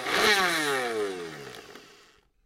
Sound of belnder recorded in studio.